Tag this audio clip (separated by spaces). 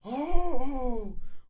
final surprise